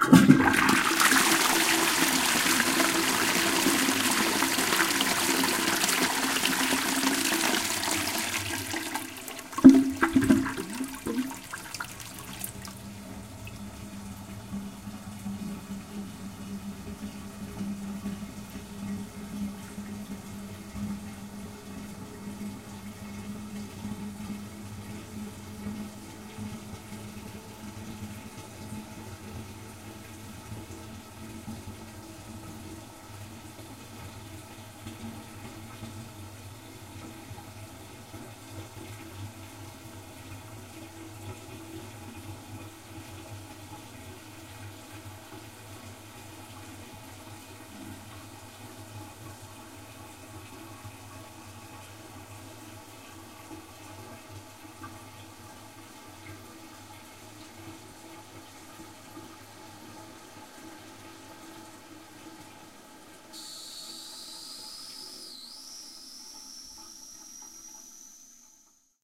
This is a toilet recorded at the Abbey Hotel in Shepherd's Bush, London, England, in April 2009. Recorded using a Zoom h4 and Audio Technica AT-822 single-point stereo microphone.